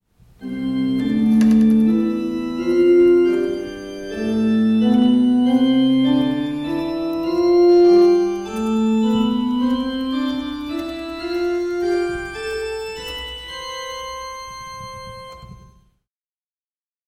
ChurchNoise OrganPractice01 Mono 16bit
Organist practicing 01